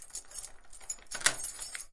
Door Lock 01
House door locked. Recorded on a Zoom H4N using the internal mics.
lock, locked, locking, door, keys